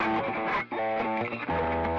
Randomly played, spliced and quantized guitar track.
120bpm, buzz, distortion, gtr, guitar, loop, overdrive